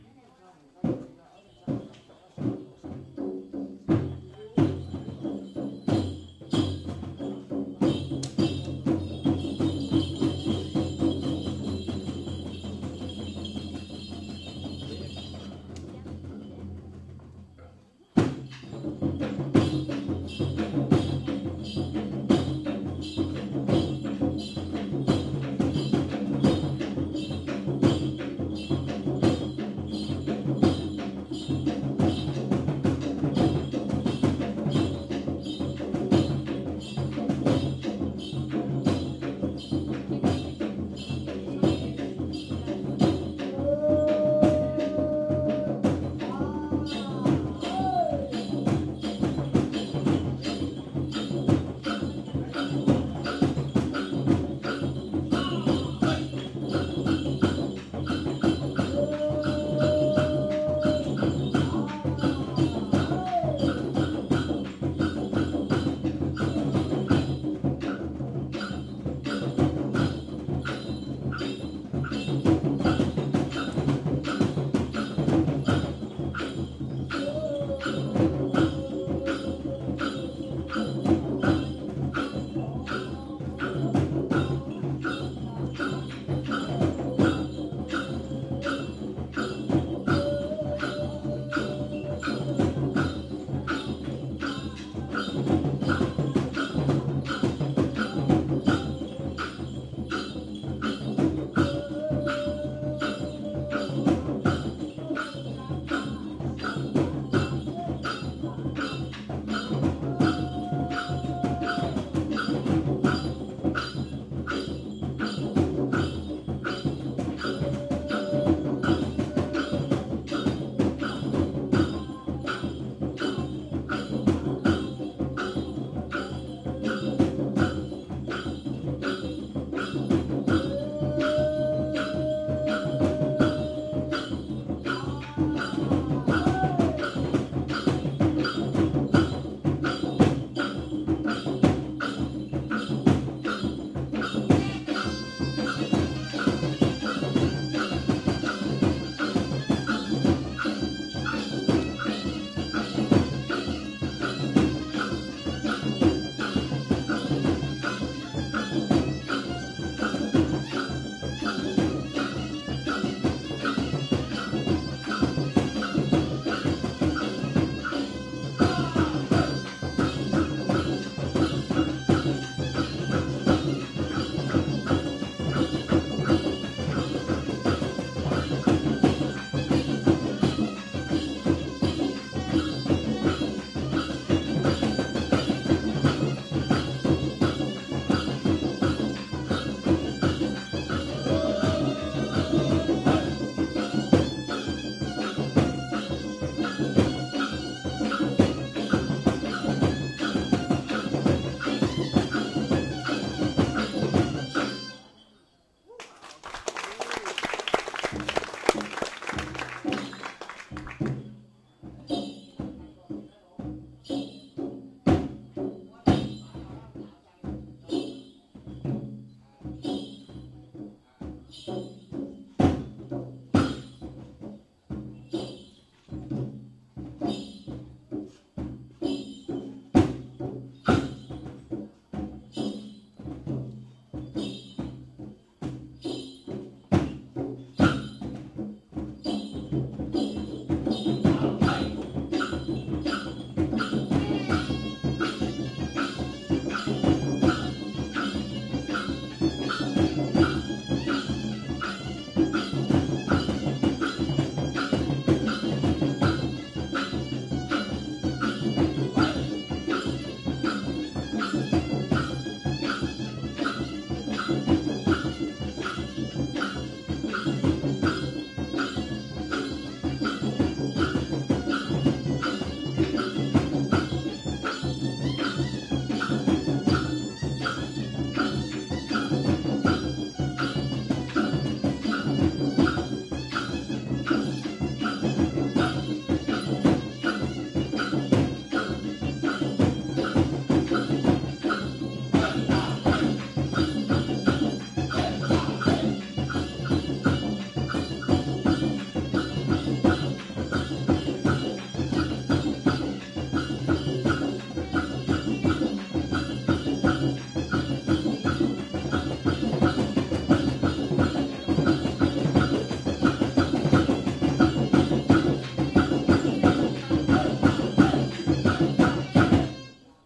BR 051-1 VN ChamMusic
Cham music and dances.
This is a file recorded in Vietnam in October 2008, in which you can hear the traditional music and dances of Cham people.
Recorder : Boss Micro BR.
music, culture, people, Vietnam, instruments, cham, drums, dance, voices, traditional, ethnic